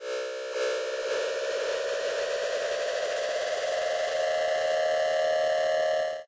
Starting Protocol Effect 02
Starting protocol unknown machine faster version
Thank you for the effort.
computer, effect, game, machine, original, sample, sound, unknown